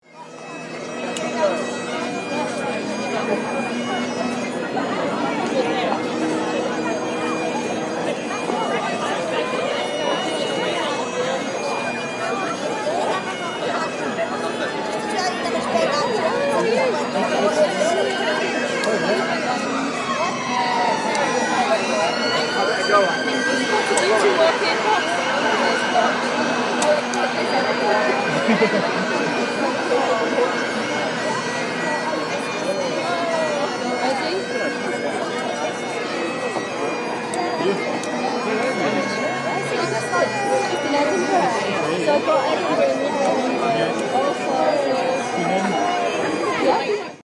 Edinburgh University Graduation Day
Recorded with an Iphone 5. Out side of the McEwan Hall, Edinburgh during graduation day. Talking, piper playing and a siren in the background
scotland, graduation, edinburgh, crowd, piper